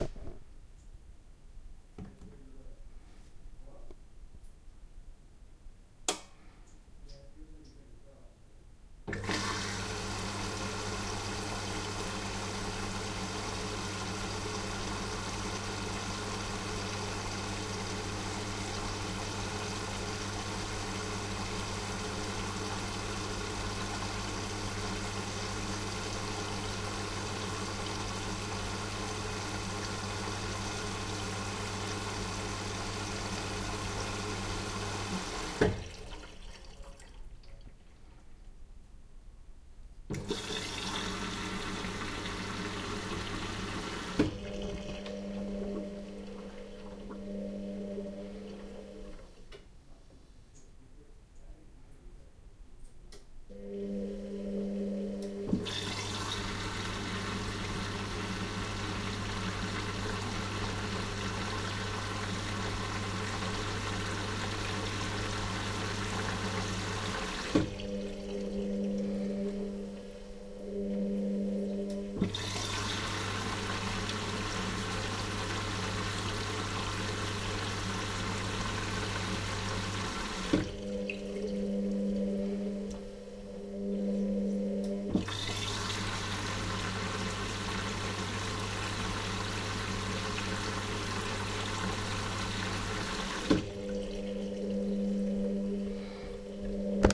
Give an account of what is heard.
the sound of a washing machine in a house in london